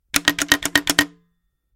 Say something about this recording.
The sound of an old bakelite telephone, the hook is pressed several times.
Recorded with the Fostex FR2-LE recorder and the Rode NTG-3 microphone.